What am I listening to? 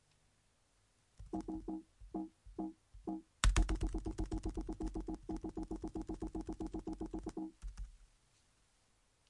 pc beep with keyboard click
pop up message beep
key, pc, computer